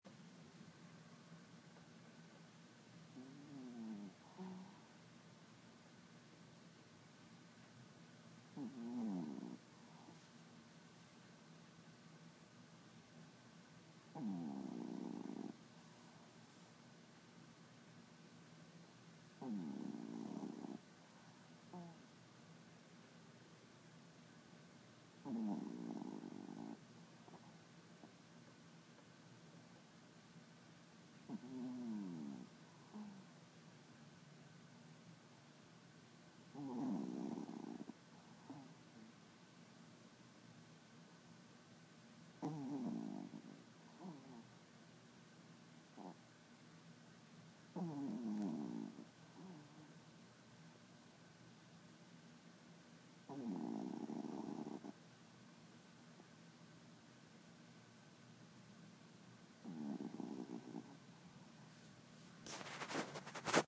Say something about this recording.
Small Dog Snoring
This is the sound of a 10 year-old small, male Poodle/Chihuahua mix as it snores while sleeping.